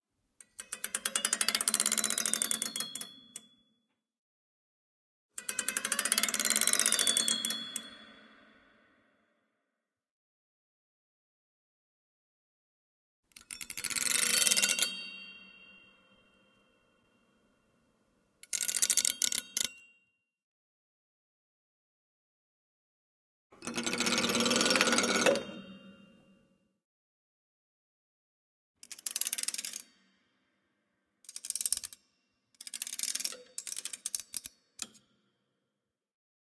Gliss plectrum over pegs
Glissando over piano tuning pegs with plectrum
Gliss, pegs, piano